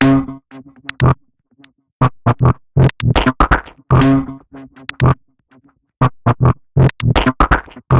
Massive Loop -49
An weird experimental loop with a minimal and melodic touch created with Massive within Reaktor from Native Instruments. Mastered with several plugins within Wavelab.
drumloop, experimental, minimal, 120bpm, loop